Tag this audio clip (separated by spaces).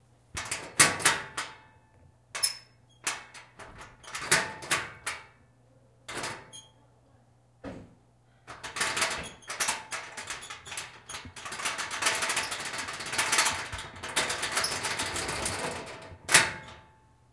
metal-door Locker door metallic